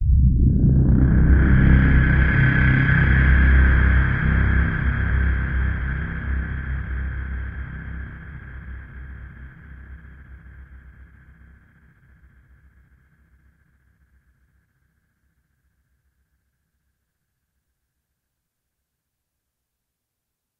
Dystopian Future - FX Sounds (22)
abstract, alien, animation, cinematic, city, effect, film, future, futuristic, sci-fi, sound-design, soundeffect